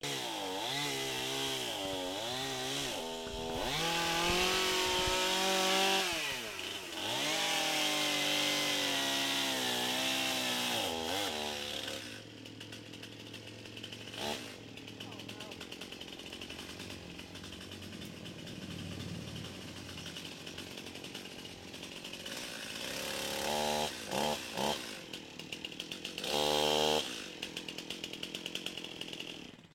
Chain saw 1
Chainsaw revving and cutting down tree limbs in rural back yard. Guy yells something once or twice in background.
chain, chainsaw, tree-cutting